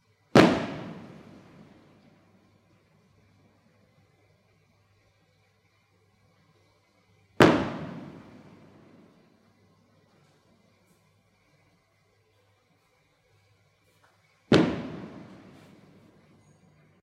mono recording of three firework blasts